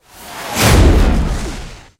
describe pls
Sound composed of several layers, and then processed with different effect plug-ins in: Cakewalk by BandLab.
I use software to produce effects:
abstract, atmosphere, background, cinematic, dark, destruction, drone, futuristic, game, glitch, hit, horror, impact, metal, metalic, morph, moves, noise, opening, rise, scary, Sci-fi, stinger, transformation, transformer, transition, woosh